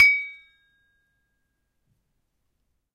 Sample pack of an Indonesian toy gamelan metallophone recorded with Zoom H1.
metallophone, metallic, gamelan, percussion, percussive, hit, metal